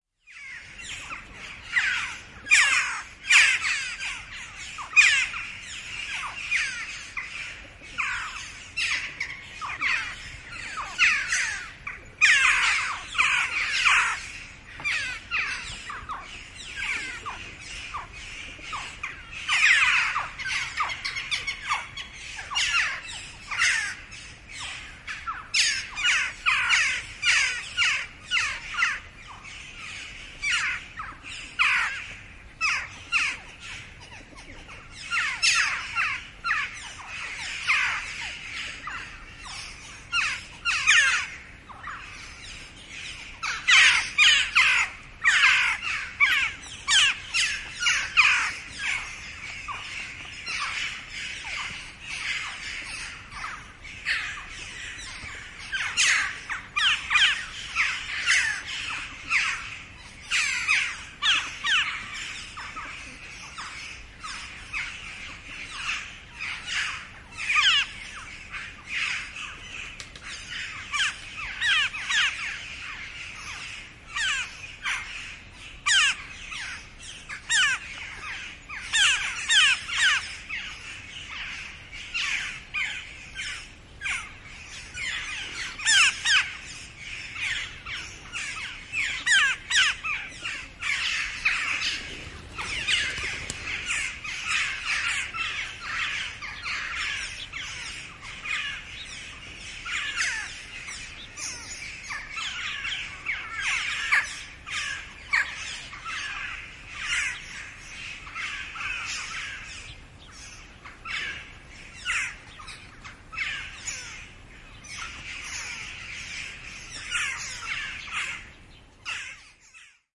Pieni parvi naakkoja ääntelee myllyn katolla. Välillä jokin pulu vaimeana. Corvus monedula)
Paikka/Place: Suomi / Finland / Vihti
Aika/Date: 15.07.1984